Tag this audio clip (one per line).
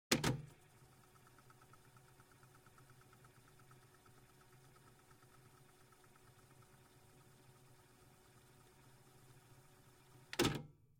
audio
cassette
cassettedeck
deck
fast
forward
player
recorder
tape
tapedeck